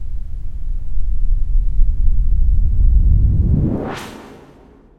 part of drumkit, based on sine & noise